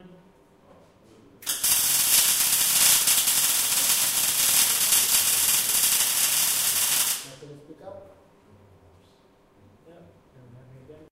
long duration welding